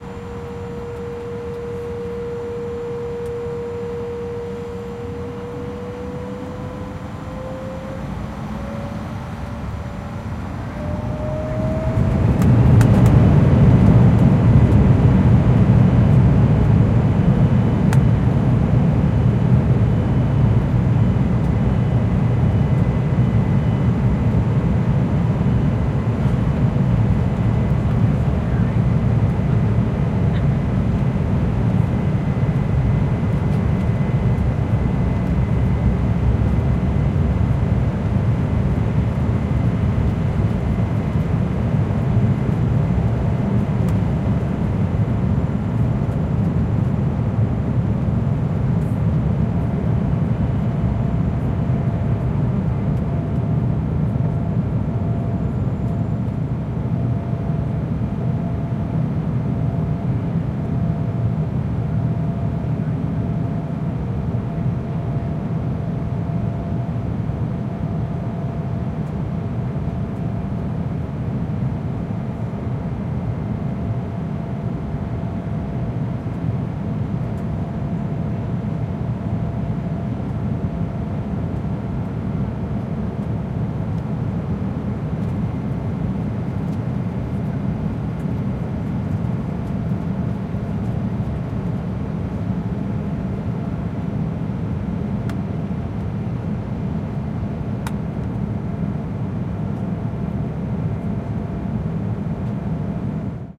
Embraer 175: Take off and climb (more engine)
Embraer 175 taxiing to runway, engines throttle up, plane takes off and ascends with more intense engines sounds. Plane lifts from the ground when the bass subsides.